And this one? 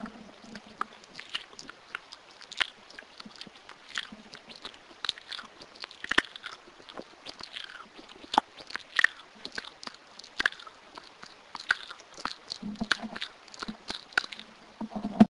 Ruidos Boca
Field recording of my mouth making noises
field, noises, mouth, recordings